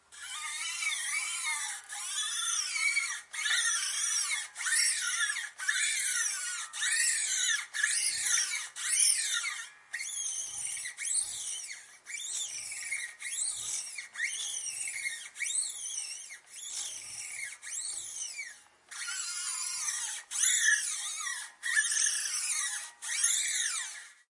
Steel String Waxing
Recording of a polishing, using a wax for steel, procedure, which was performed on an electric guitar.
The recording took place inside a typical room in Thessaloniki, Greece.
Recording Technique : M/S, placed 20cm away from the guitar fretboard, with 0 degrees angle, with respect the vertical orientation.